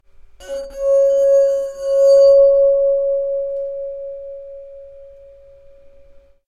Corto Bibrante
bohemia glass glasses wine flute violin jangle tinkle clank cling clang clink chink ring
bohemia, chink, clang, clank, cling, clink, flute, glass, glasses, jangle, ring, tinkle, violin, wine